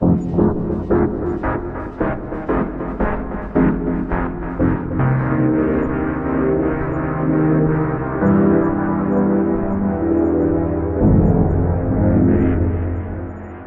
Synth stabs from a sound design session intended for a techno release.